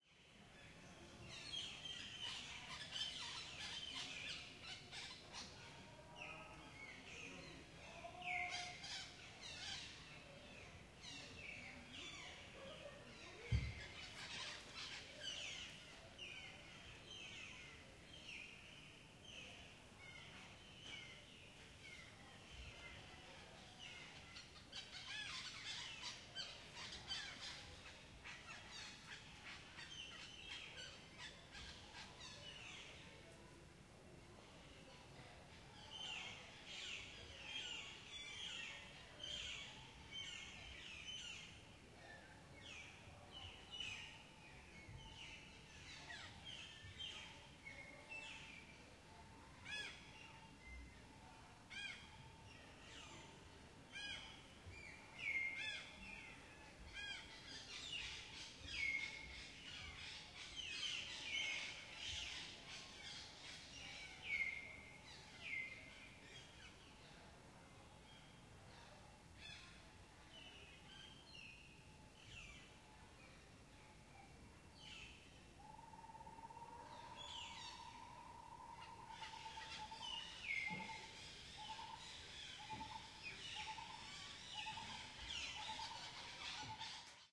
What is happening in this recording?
Some brids tweeting after the rain.